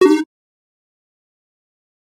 GUI Sound Effects 059
GUI Sound Effects